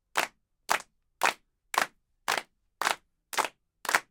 It was recorded by professionals in the studio.
Recorded with Pro Tools 9, interface digidesign 192, mic neumann u87.
Kiev, Ukraine.
claps, clap, pop, percussion, drums